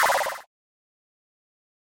Skip SFX
A basic sound effect used for feedback when a player skips dialogue in a video game.